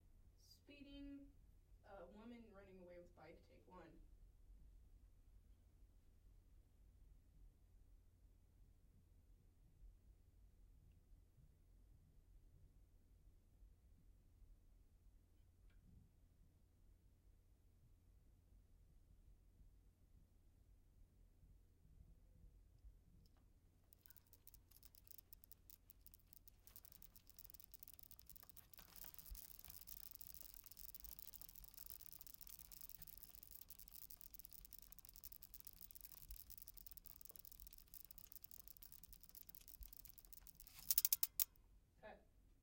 Bike Ticking. Hurts heart and soul. Starts around 0:24